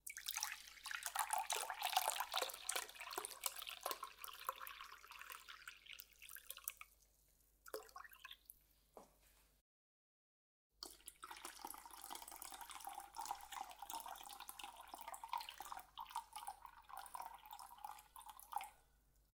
WATRPour Measuring And Pouring Water 01 JOSH OWI 3RD YEAR SFX PACK Scarlett 18i20, Samson C01
pouring water back and forth between measuring cups and then into a bowl
cooking, cup, fill, filling, glass, kitchen, liquid, measure, owi, pour, pouring, water